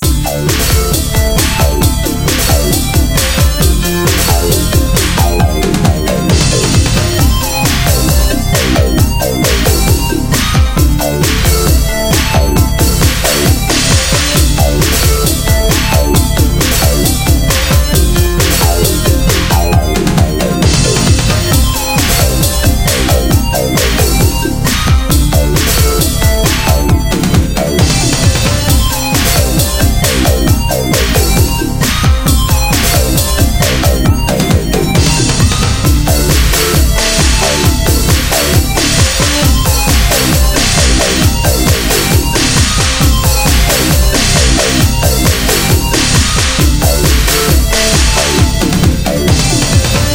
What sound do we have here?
Charade 134 Dm
EDM Electronic Music Dance 134 BPM
134, BPM, Dance, EDM, Electronic, Music